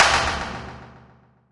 Impulse responses recorded while walking around downtown with a cap gun, a few party poppers, and the DS-40. Most have a clean (raw) version and a noise reduced version. Some have different edit versions.
convolution, impulse, ir, response, reverb